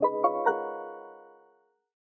Task successful sfx
An happy chord, to indicate a dialog box or any positive event.
good, soundeffect, positive, happy, sfx, success, game, videogame, sounddesign, minimalistic